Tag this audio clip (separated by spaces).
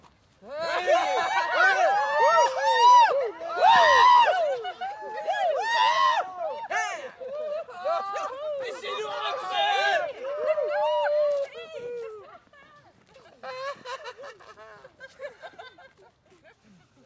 people cheering